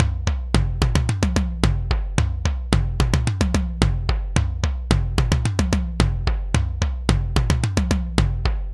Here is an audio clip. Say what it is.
A dense, danceable tom-tom groove at 110bpm. Part of a set.